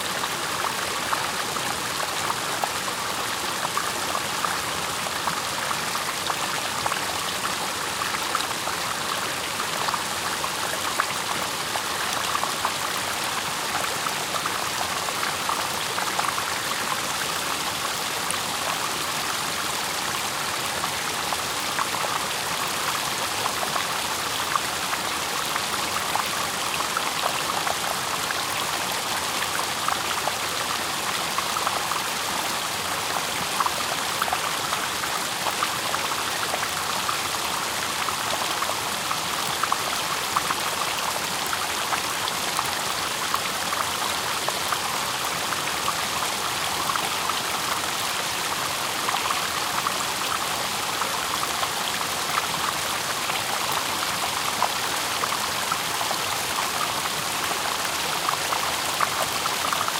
I'd love to hear about the projects you use my sounds on. Send me some feedback.
Splash, Creek, Ambience, Waterfall, Stream, Nature, Dam, Flow, Background, River, Water, Mortar